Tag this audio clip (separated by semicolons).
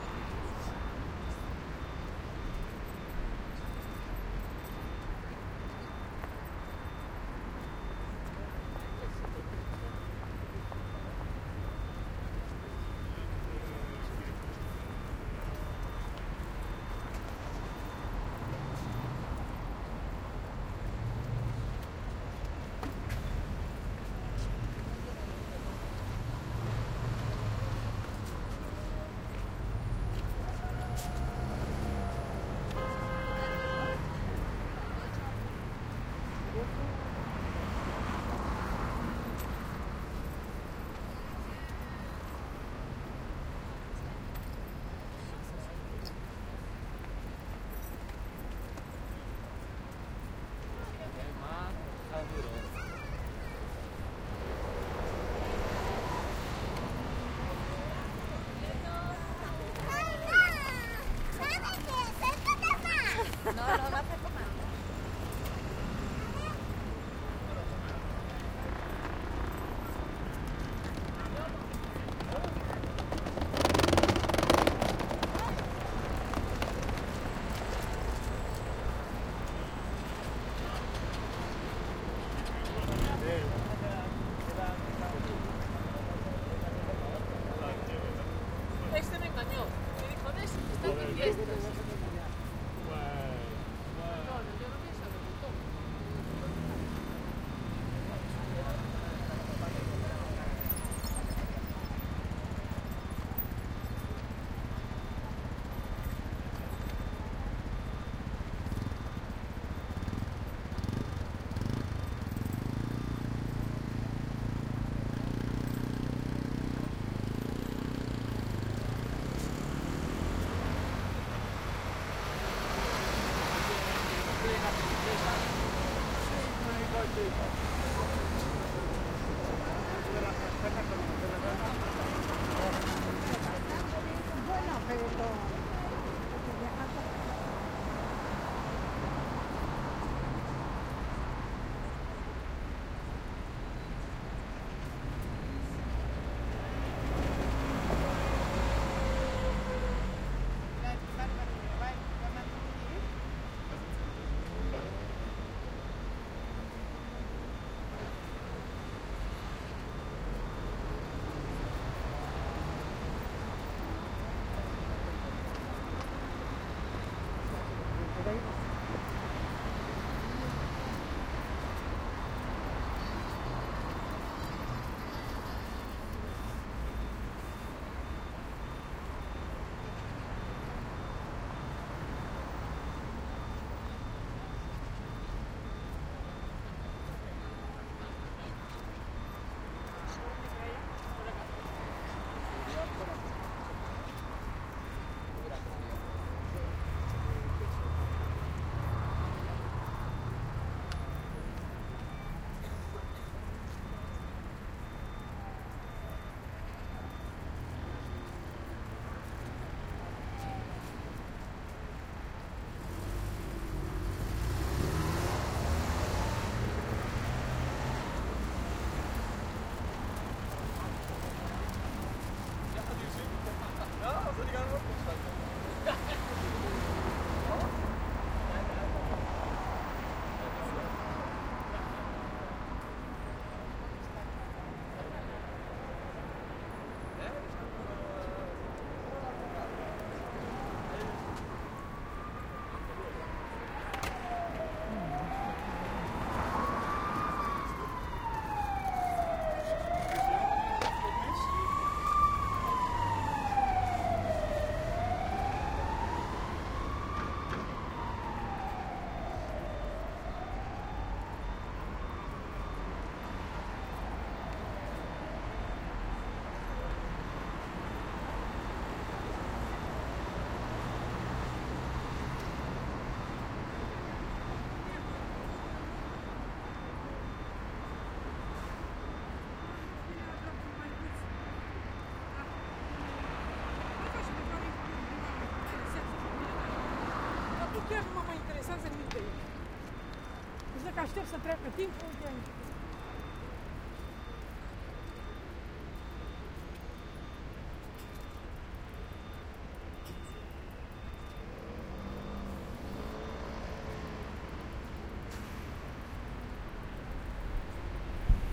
Soundfield; atmosphere; street; traffic; city